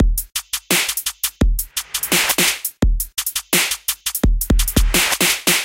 drumsteploop 170BPM 5
drum, drumstep, dubstep, hat, hi, kick, loop, shaker, snare